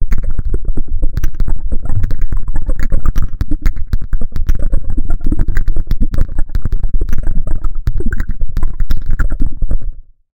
Robotic Noises 1
Basically some robotic noises